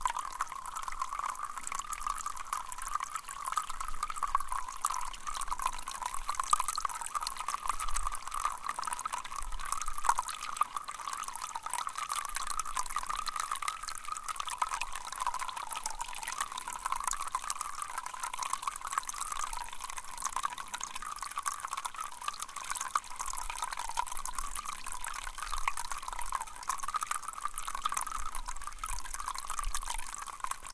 This is a field recording of a small rivulet formed on a mountain fire road after a rainfall. The water was tumbling over rocks and into small puddles.
Atmosphere, Field-Recording, Mountain-stream